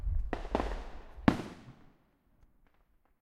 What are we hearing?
Fireworks over lake in small valley #6
Fireworks in a small valley, over a lake. Single pops with a minimal wind noise.
Explosion, Firecracker, Fireworks